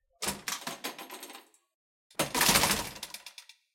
Glass Door Open/Close
This was made recording doors around my university. I used a ZoomH1 and edited in Audition.
metal, doors, push, handle-bar, glass-door